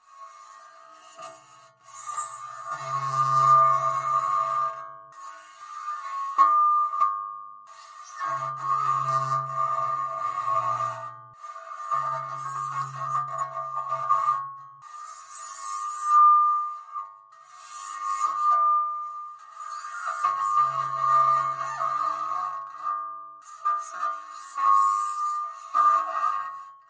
steel piping
Recorded by attaching a contact microphone to a tubular steel hand rail on a set of stairs and rubbing along the tube. Microphone was used with a Zoom H5
contact-microphone, piping, ethereal, piezoelectric